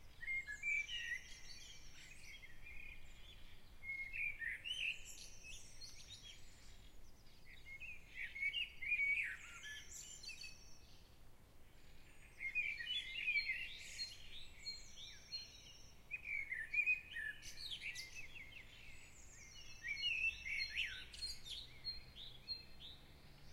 birds at 4 p.m.
Recorded on H4n, park in central part of city
field-recording, soundscape, ambiance, nature, city, birds, ambient, park, atmosphere, ambience